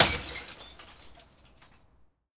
Glass Shatter 2
Throwing away glass trash.
bin, glass, crunch, break, drop, shards, shatter, recycling, bottle, smash, crack